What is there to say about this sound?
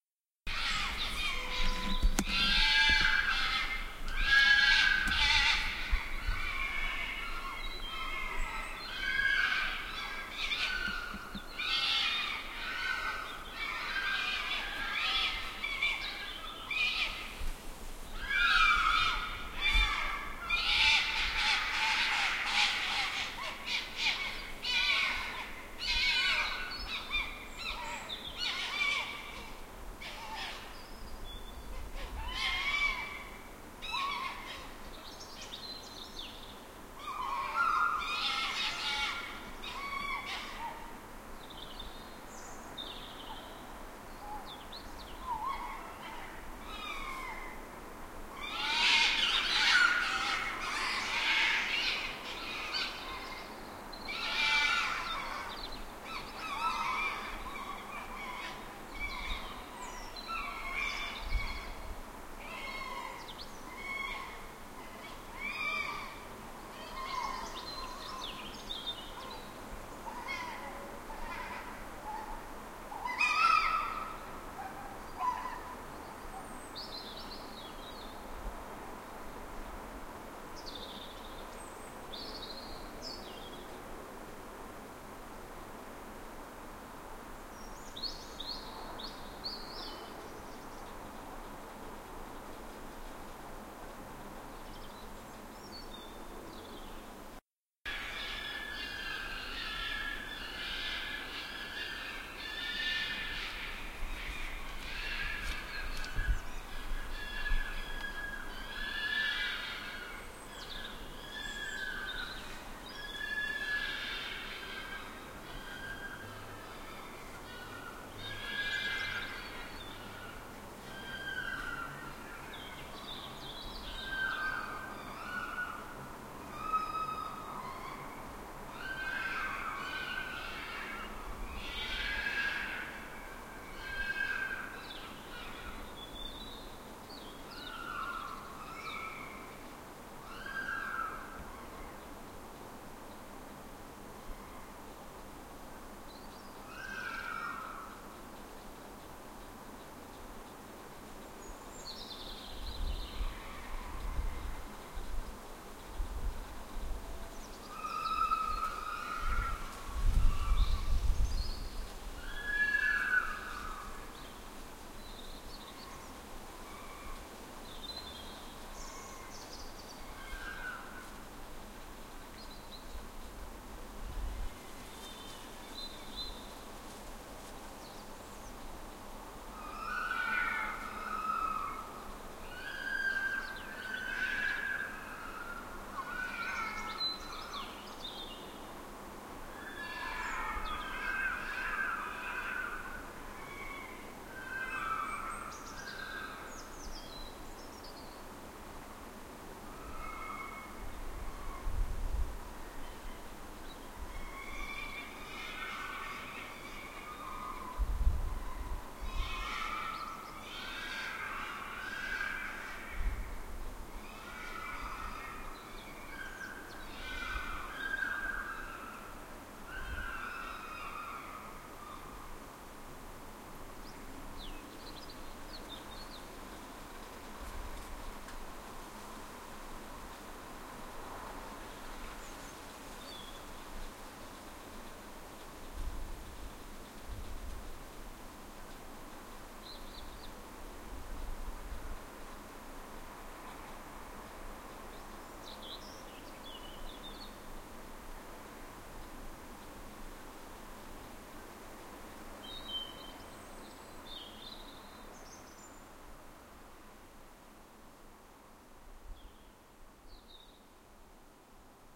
Foxes crying at night in Brockley
Recorded at night with external microphones using a Panasonic Lumix GH-2 camera,
out of the window from 2nd floor.
In this sound file I mixed two sound recordings, the chronologically second record I put first, the first record I put second using a little fade out on the latter.
Some night bird interacts.
General
Format : MPEG Audio
File size : 10.00 MiB
Duration : 4mn 22s
Writing library : LAME3.98r
Software : Lavf56.25.101
Audio
Format : MPEG Audio
Format version : Version 1
Format profile : Layer 3
Duration : 4mn 22s
Channel(s) : 2 channels
Compression mode : Lossy
Stream size : 9.99 MiB (100%)
Writing library : LAME3.98r
Encoding settings : -m s -V 4 -q 3 -lowpass 20.5
ambiance, ambience, ambient, atmo, atmos, atmosphere, background, background-sound, birds, city, cries, crying, dramatic, field-recording, foxes, general-noise, intense, loud, nature, night, soundscape, spring